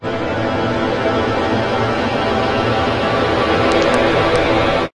sampled accordion sound